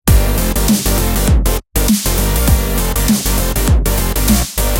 Funky Synth Loop
Funky electronic dance thing. I was gonna make this a full song, but couldn't finish it. Chords were made with the "Eighties Poly Synth" patch within Logic Pro X, Bass is "Reverie" from the "Party Design Famous Vol. 1" patch pack for NI Massive, And the Drums are from these two:
bass, beat, dance, EDM, electronic, funk, music, synth, synthesizer